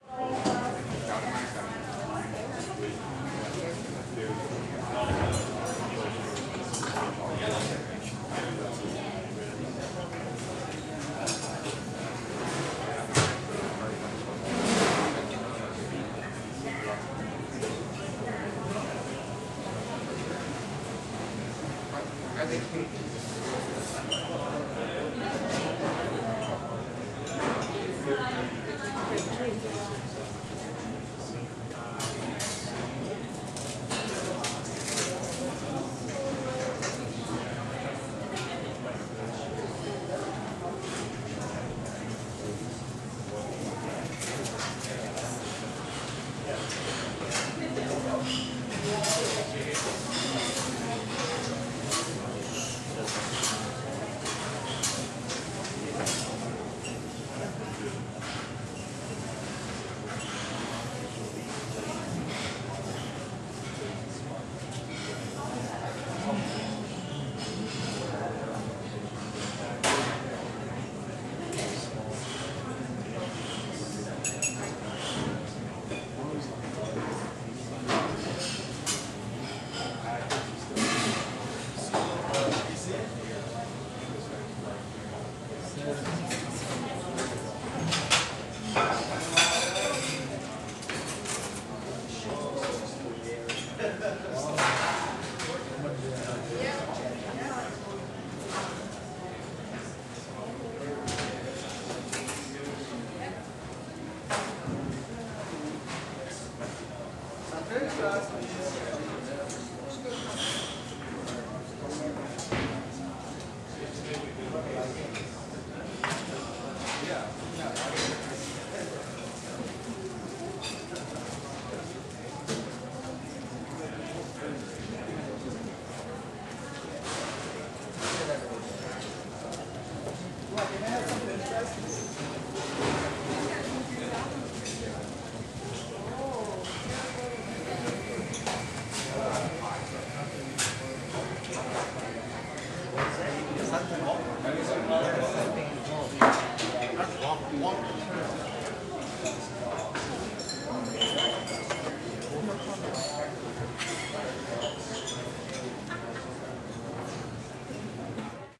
Restaurant Lightly Busy